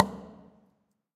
Recordings of different percussive sounds from abandoned small wave power plant. Tascam DR-100.
fx, field-recording, plastic, percussion